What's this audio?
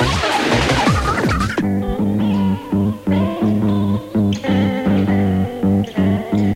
TBB = Tape Behaving Baddly
I had to look hard for this, some of my oldest and shitiest tapes.
Hard to know what this is (was) the tape plays so bad that it is unrecognizeable. This was always a shitty cheap tape and ageing about 25 years in a drawer has not done it any favours.
Recording system: Not known
Medium: Toshiba C-90T, about 25 years old
Playing back system: LG LX-U561
digital recording: direct input from the Hi-Fi stereo headphone socket into the mic socket on the laptop soundcard. Using Audacity as the sample recorder / editor.
Processing: Samples were only trimmed